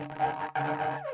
Playing the alto sax with vibrato with no mouthpiece, like a brass instrument.